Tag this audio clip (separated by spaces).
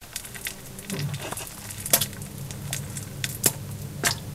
bathroom poo pooing poop shit toilet water